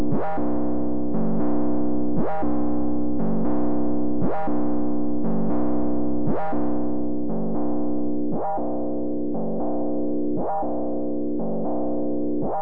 created with Vaz software synth Bass
bassline, synth